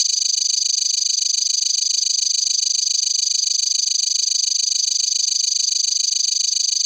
OSD text 5
Have you seen films like Terminator, played games like StarCraft Broodwar and seen TV series like X files and 24 then you know what this is.
This sound is meant to be used when text is printed on screen for instance to show date / time, location etc.
Part 5 of 10
beep, film, futuristic, long, osd, scifi, simple, text